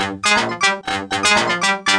A kind of loop or something like, recorded from broken Medeli M30 synth, warped in Ableton.